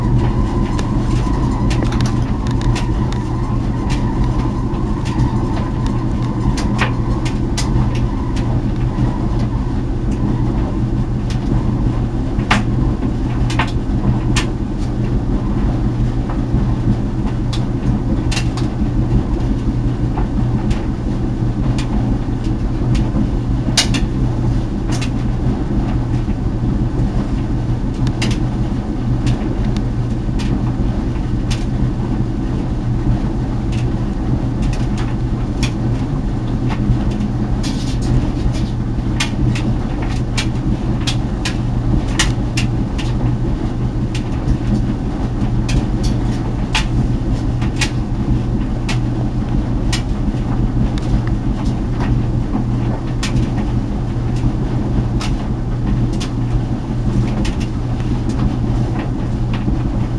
This is a recording of a dryer running.
laundry, clothes, clothes-dryer